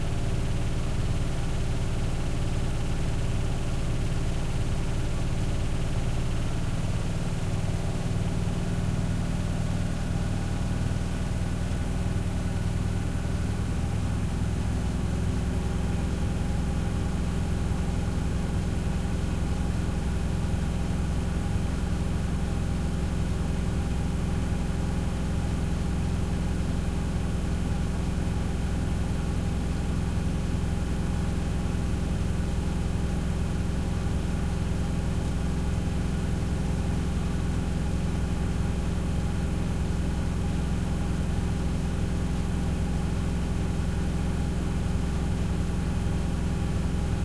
A Miele W1 washing machine at the end of it's washing program when it's centrifuging the clothes to make them as dry as possible.
The recording was made one meter away from the washing machine using the Zoom H6 with the XY capsule.
The recording is raw and has not been compressed nor processed before upload.